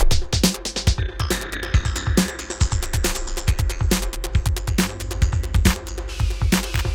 138 bpm drum loop
dnb, drillnbass, drum, drumandbass, drumnbass, drums